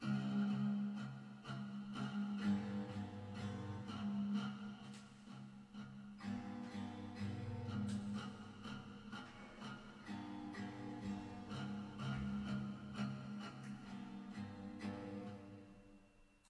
creepy guitar loop
Found an old broken guitar, started playing, added reverb and did minor EQ.